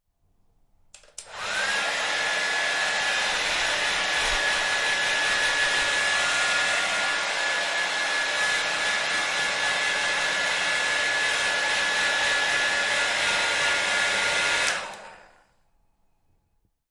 hairdryer
ZOOM H6